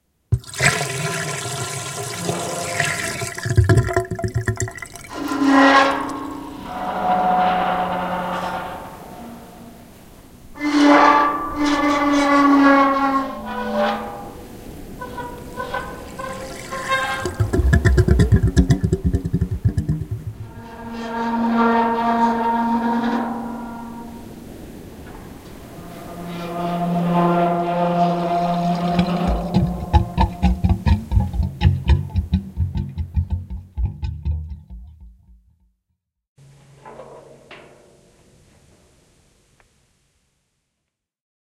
Ship sinking down a plughole
A sound montage of a gate, my bath, and an ambient ocean recording.
sea, ship